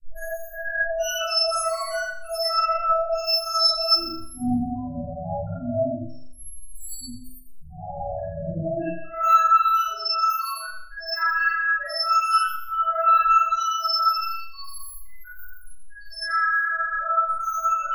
Another strange tune. I really don't remember it. bizarre.. i discovered this by using noise removal on raw data with audacity
creepy, glitch, odd, simple, weird, electric, lo-fi